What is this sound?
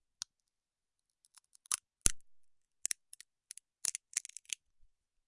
Breaking open a walnut using a metal nutcracker.